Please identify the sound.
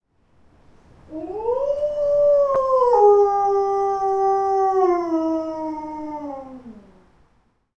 Boris Squeal Moan
Our Alaskan Malamute puppy, Boris, recorded inside with a Zoom H2. He is apt to moan in the morning when my wife leaves.
moan, Wolf, husky, malamute, howl, dog, growl, bark